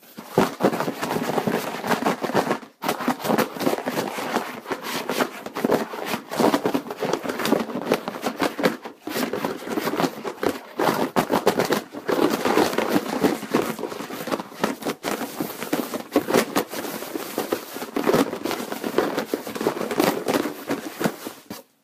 Shaking a box of things
Items include a pile of Sega Game Gear catridges, and inflatable packing material.
jumbling, jumble